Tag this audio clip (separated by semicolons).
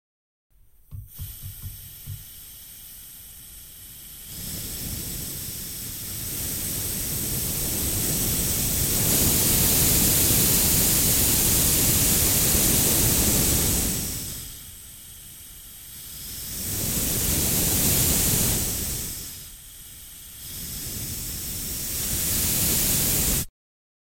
blow-torch; escape; game; gas; leak; leaking; steam